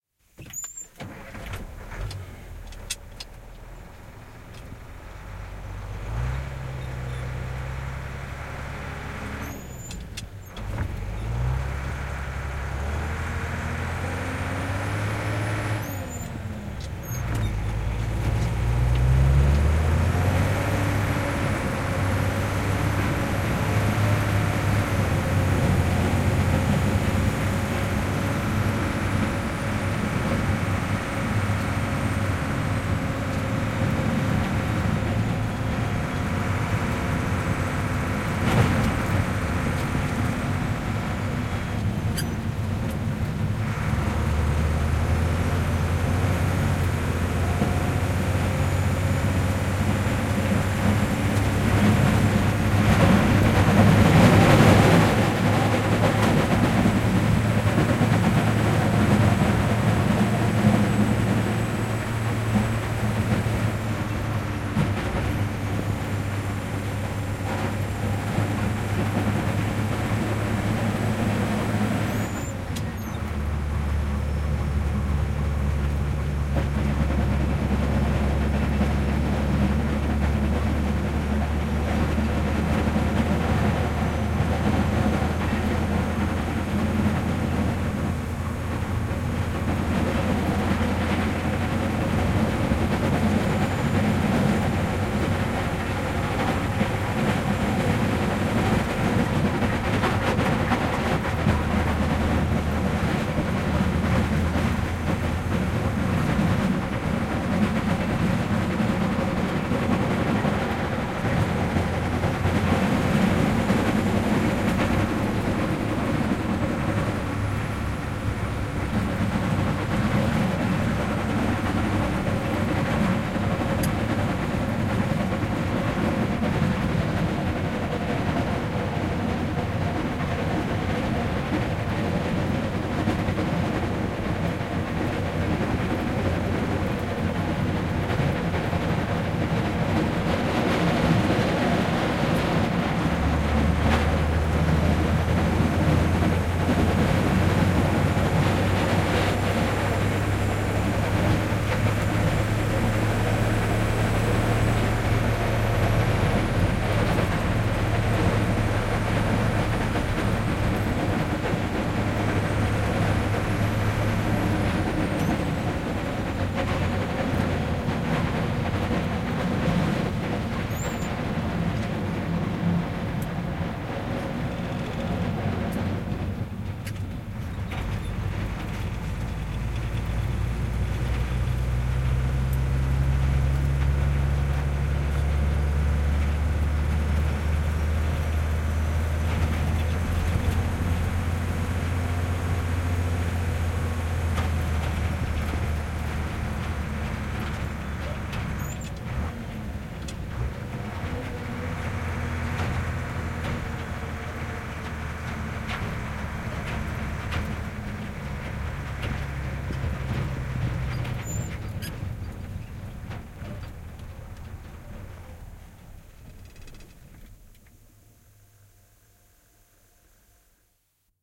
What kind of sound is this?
Lumiaura, kuorma-auto, ajoa / A truck with snowplough, driving inside, plough rattling
Tietä aurataan, ajoa autossa, aura kolisee. Kuorma-auto Sisu.
Paikka/Place: Suomi / Finland / Vihti
Aika/Date: 18.03.1976
Finnish-Broadcasting-Company, Winter, Soundfx, Vehicle, Finland, Kunnostus, Yle, Suomi, Yleisradio, Snow, Lumi, Kulkuneuvo, Talvi, Tehosteet, Field-Recording, Repair